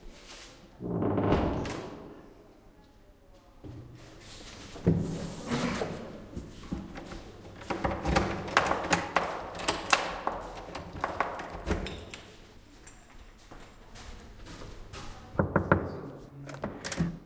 Opening, closing, knocking and locking the door. Recorded with Zoom H1 build-in stereo mic.
close, knock, lock, open, stereo